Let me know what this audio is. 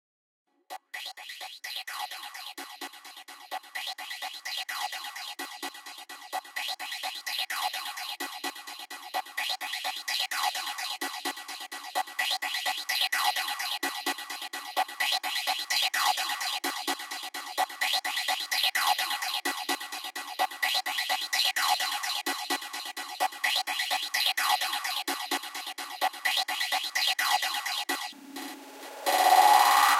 Talk Fauxer
Vox thats been effected and gitched with a vol swell
vocals, glitch, vox